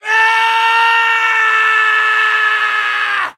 Mono recording of me angrily screaming.